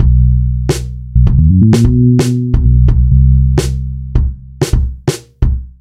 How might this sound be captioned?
Hip-Hop Loop #1
A hip-hop/rap loop made in FL Studio.
bass, beat, beats, deep, dope, drum, drum-kit, drums, funky, ghetto, groovy, hard, hip, hip-hop, hop, improvised, kick, loop, loops, music, old-school, rap, sample, slow, smooth, song, vintage